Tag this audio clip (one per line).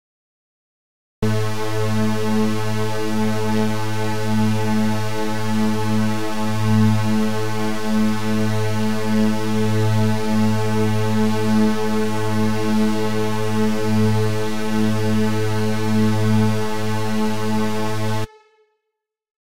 Raw
Sawtooth
Strings
Waveforms